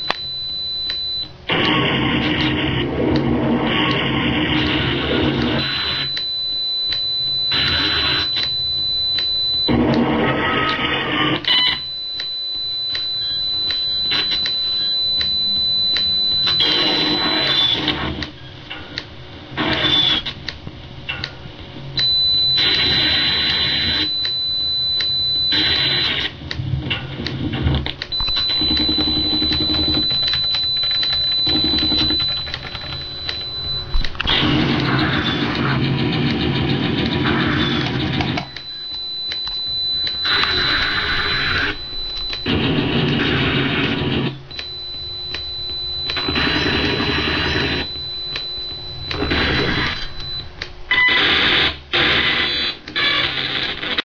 The sound generated by my sound card whenever a game crashes. Stangely spooky.